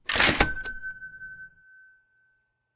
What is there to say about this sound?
Cash Register Purchase
Cash Register Sound by CapsLok remixed for extra depth.
Created in Audacity.
Uses:
184438__capslok__cash-register-fake
142895__ceremonialchapstick__winchester-30-30-lever-action-eject
36328__unclesigmund__coinbank
Bell, Ca-Ching, Cash, Casino, Grocery, Machine, Market, Register, Slot, Store, Super